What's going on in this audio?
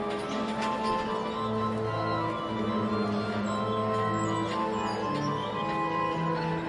The Edinburgh millennium clock chiming at the National Museum of Scotland.